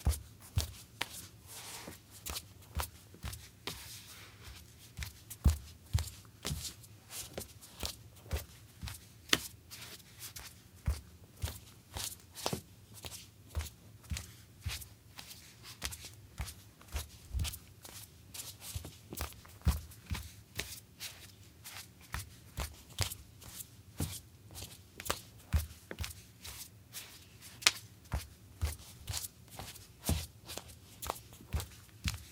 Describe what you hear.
Footsteps, Solid Wood, Female Barefoot, Flat-Footed, Medium Pace
barefoot, female, footsteps, solid, wood